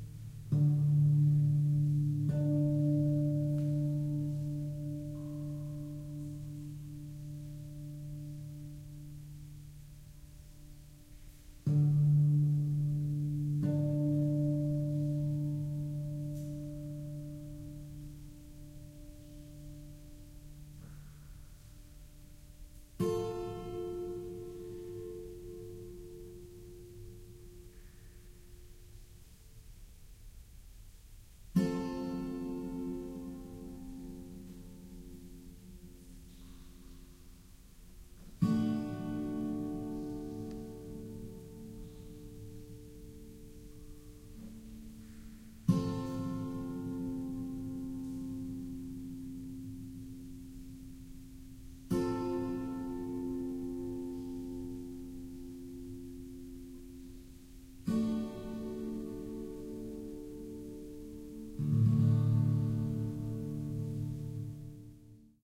acoustic guitar in tunnel
Acoustic guitar, Drop-D tuning, recorded at end of unfinished tunnel in Stumphouse Mountain, Oconee County South Carolina. Listen for the water drops.
Tunnel is cut in granite. The mountain may have defeated the railroad butleft an incredible echo chamber, a 13 foot high, 12 foot wide natural reverb hall 1600 feet long!
Core Sound omni mini-mics, Sony RZ90 as preamp, Fostex FR2-LE. Apologies for the high noise floor. I plan to goback and record more with the NT4, other mics.